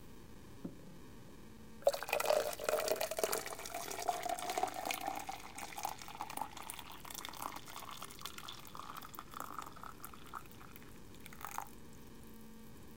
pouring water
Pouring hot water into a cup.
glass, pouring